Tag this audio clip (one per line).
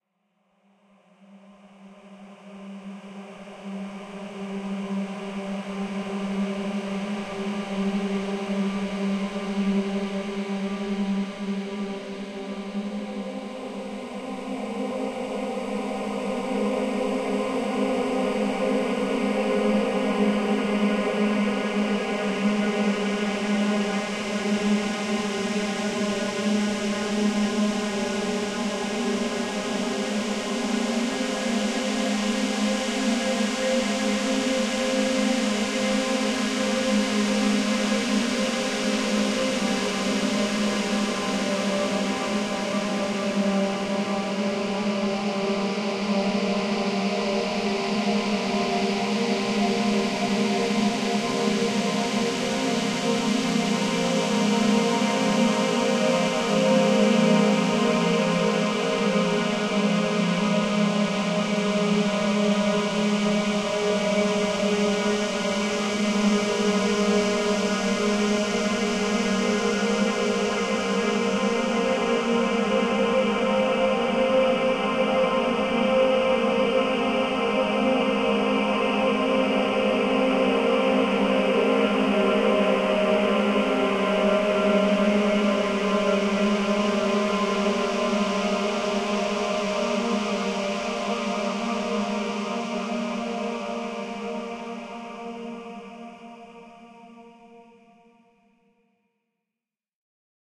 floating
ambiance
atmospheric